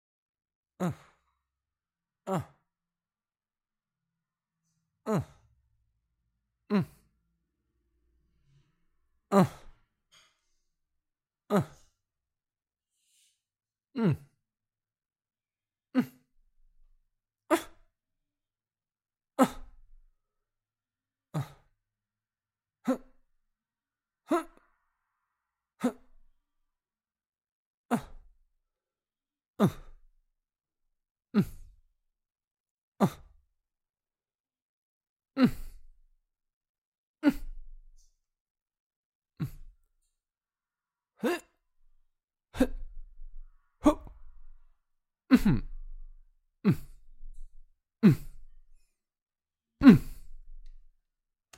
Male grounting recorded in house with Se 4400a microphone and focusrite clarett 2pre USB. I also removed noise in izotope rx and did a little gating to make sure that only clear grunts are audible. Enjoy!